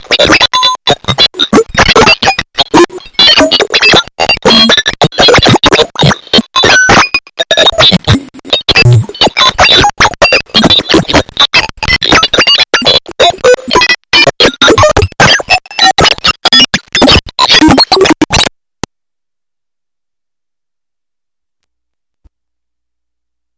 speak and math on craaaaack
a, ass, bent, crack, glitch, into, math, mean, noise, serious, shredding, speak